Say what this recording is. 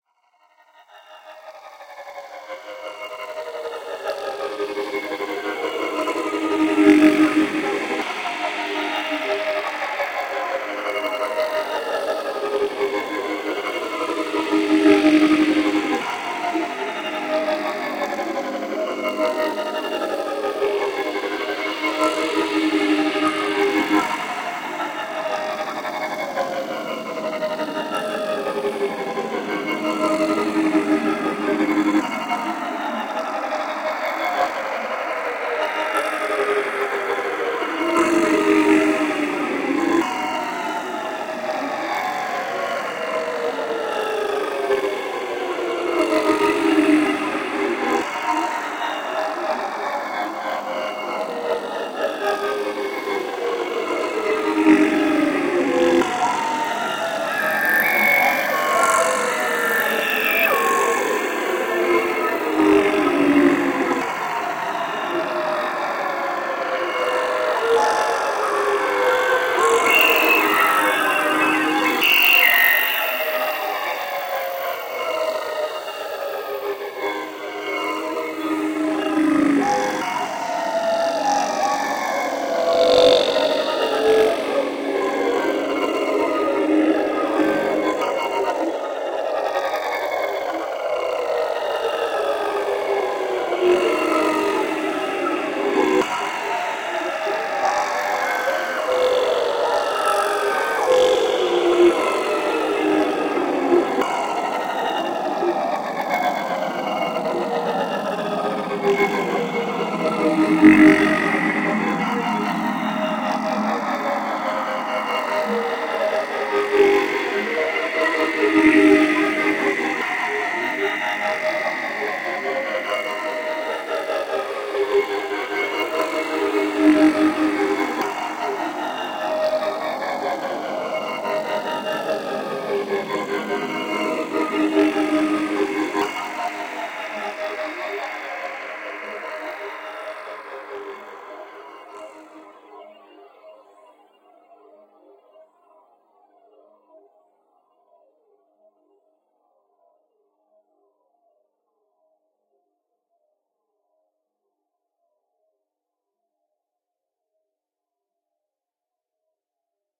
always falling
Always descending electronic glissando texture produced with Reaktor 5, Logic 8 and Spectron
electronic, fx, glissando, science-fiction, sound-art, texture, unreal